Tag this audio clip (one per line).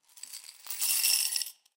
coin
Coins
currency
dime
glass
money
penny